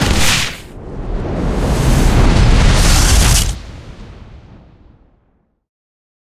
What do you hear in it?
implosion near
Mix-up of various sounds to create the ambiance of a nuclear implosion. Good for using in spacial environments. This one is the high frequency version. Both of them can be played simultaneously to variate the effect.
bang, boom, collision, explosion, fizzle, force, implosion, loud, rumble, sci-fi, space